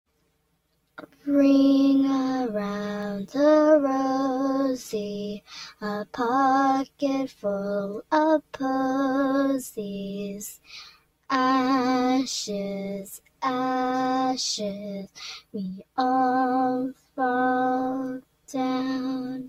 Singing kid
sing, kid